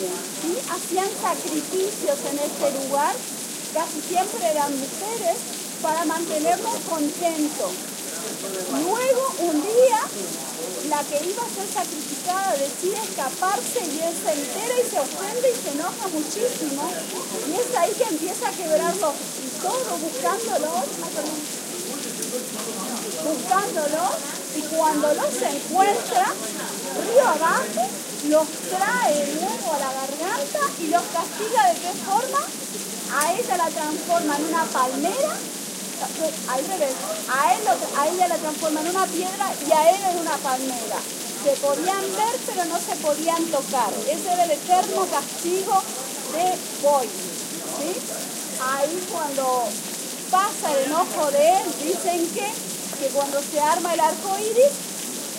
20160309 04.spanish.talk.n.rain
Noise of rain on pavement + talk from a guide explaining (in Spanish) a legend on the Iguazú waterfalls. PCM-M10 recorder, with internal mics. Recorded at Brazilian side of Iguazú waterfalls
female, field-recording, rain, Spanish, talk, tourists, voice